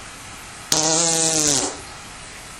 bathroom fart
aliens, beat, car, fart, nascar, noise, weird